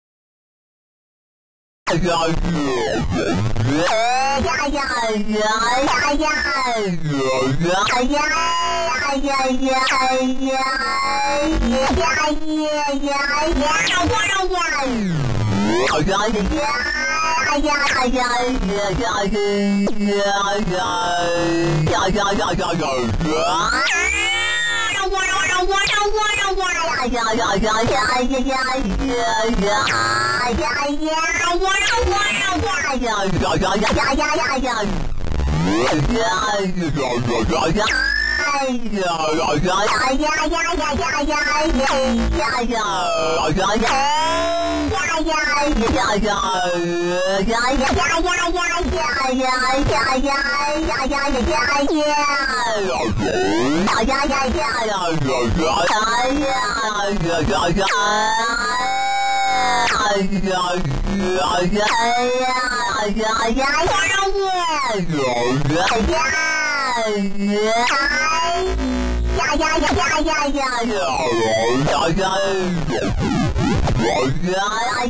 Chaos Generator Talk
"Talking" sounds created with chaos generators in Super Collider programming language.
language, robot, generator, life, alien, super, synthesized, robotic, vocoder, chaotic, chaos, collider, computerised, synthetic, talking, synthesised